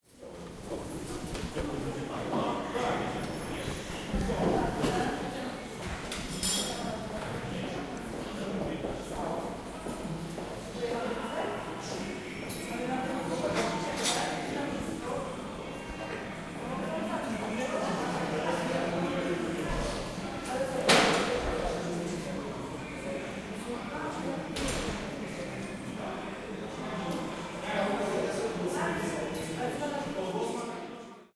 10092014 boczów polmax restaurant
Fieldrecording made during field pilot reseach (Moving modernization
project conducted in the Department of Ethnology and Cultural
Anthropology at Adam Mickiewicz University in Poznan by Agata Stanisz and Waldemar Kuligowski). Ambience of the Polmax restaurant on the Polmax gas station in Boczów located near of the national road no. 92. Recordist: Michał Orlik, editor: Agata Stanisz.
bocz, fieldrecording, gas-station, music, noise, people, poland, restaurant, road, w